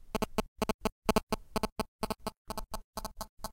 Cell Phone Interference 4
Interference from a cell phone.
Recorded with a Zoom H1.
beep, cell, communication, computer, digital, electric, electronic, electronics, interference, mobile, noise, phone, radio, signal, static